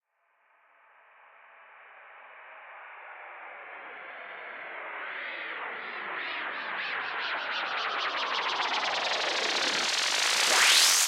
This is a so called "whoosh-effect" which is often used in electronic music. Originally it´s a 6-bars sample at 130
It´s a sample from my sample pack "whoosh sfx", most of these samples are made with synthesizers, others are sounds i recorded.